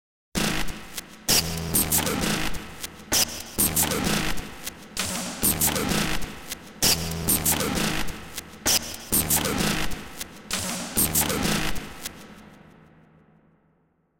the sound is constructed in fl studio 11
Dog, EL, electric, horse, King, pizza, sound